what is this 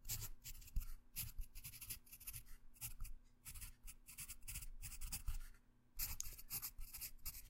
im just writing on paper
Book, Paper, Pen, Pencil, Quiet, Story, Write, Writing